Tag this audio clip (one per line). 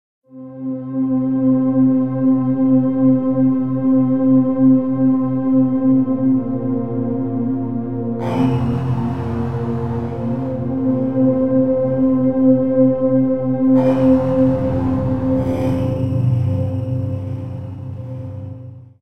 minor
smooth
moody
synthesizer